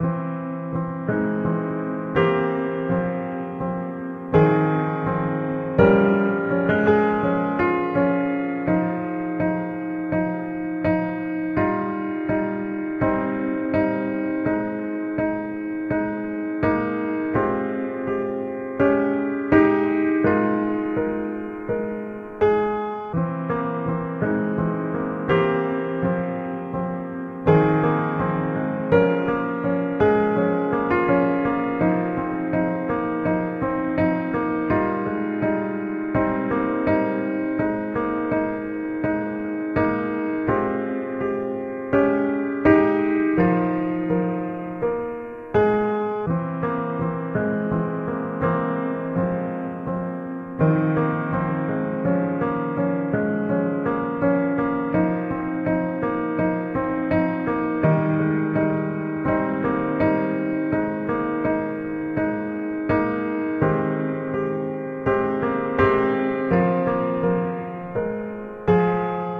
upright piano loop 7000924 083bpm
piano, upright, old, melancholic